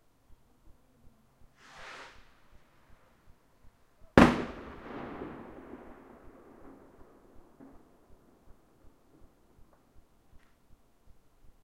A single fireworks rocket. Recorded with a Zoom H1.
fire-works, rockets, explosion, boom, new, bomb, firecrackers, bang, new-years-eve, fireworks, year, rocket